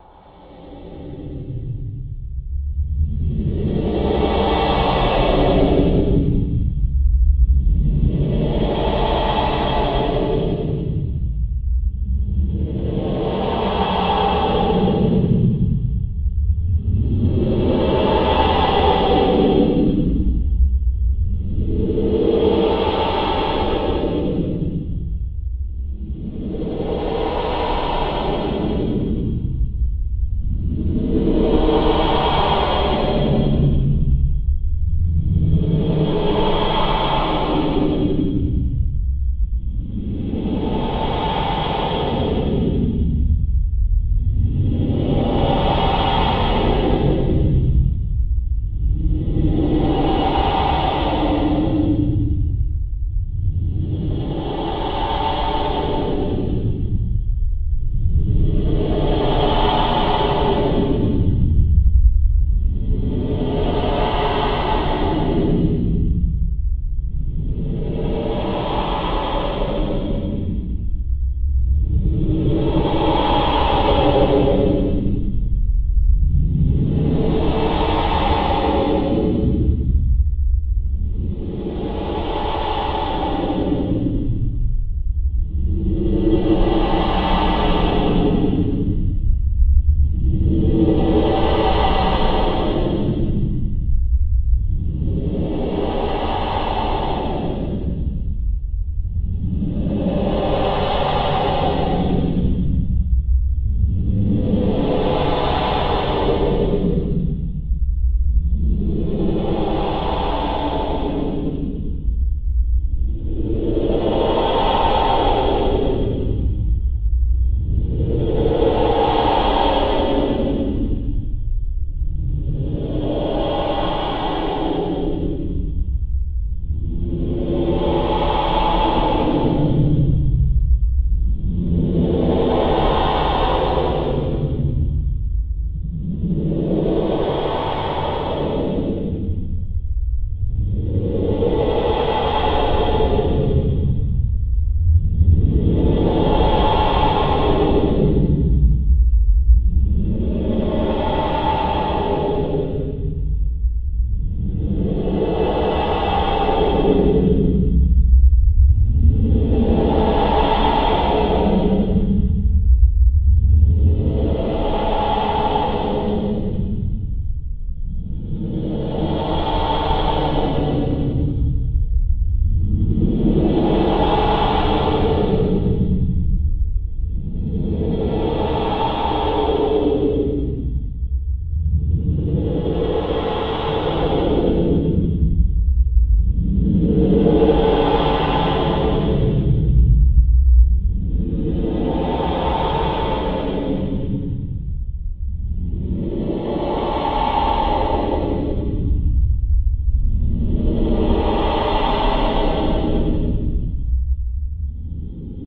Original track has been recorded by Sony IRC Recorder and it has been edited in Audacity by this effects: Paulstretch.
creepy, demon, fear, fearful, ghost, haunted, horror, nightmare, scary, slender